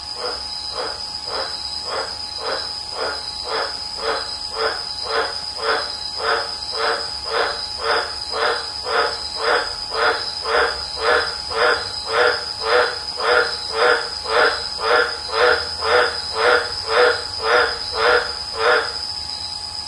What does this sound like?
This frog has taken up residence in a downpipe of our house in North Queensland, Australia during 2010-11 heavy wet summer. Keeps up a regular call at night of which this sounds like one full set.
croak, frog, tropical